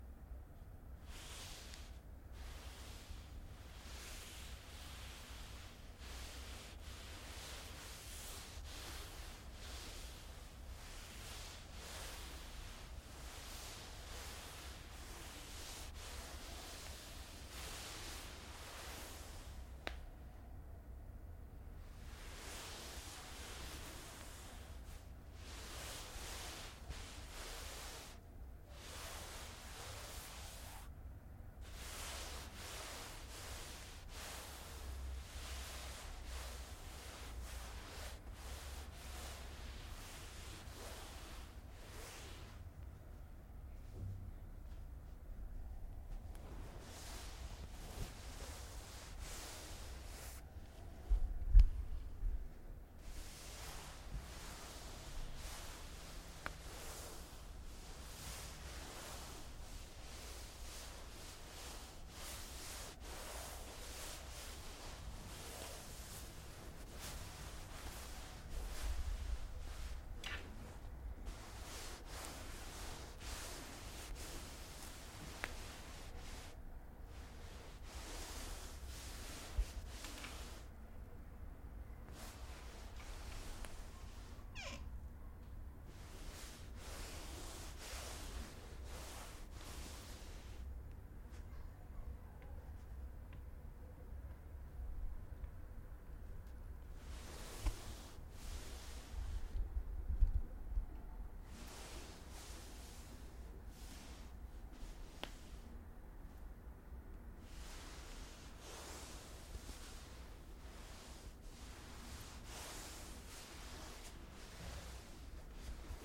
Telas sintéticas rozándose entre sí / synthetic fabrics rubbing.
Mono. Recorded using a Zoom H6 and a shotgun mic.